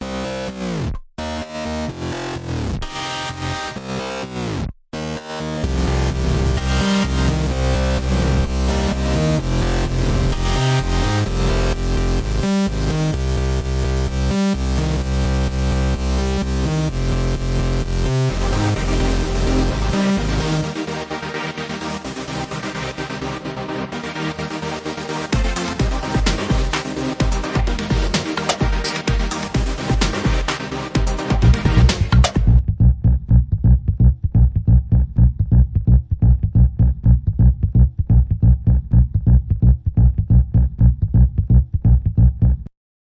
Rock Music
Rock, free, music, song